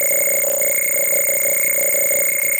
A Casio CZ-101, abused to produce interesting sounding sounds and noises